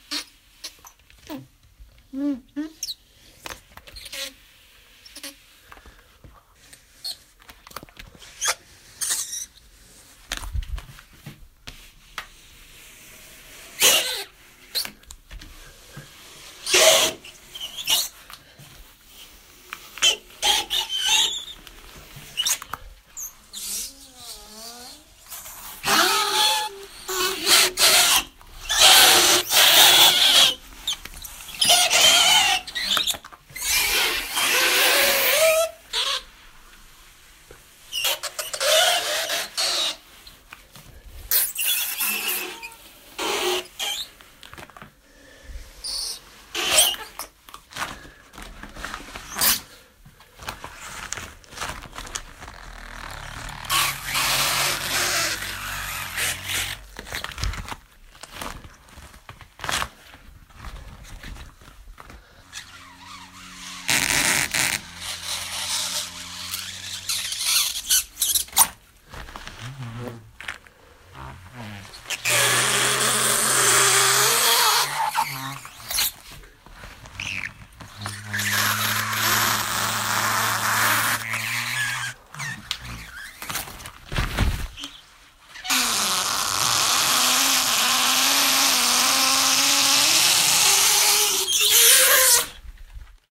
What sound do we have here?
Scrubbing rubber gloves over a thick glass surface producing typical squeak.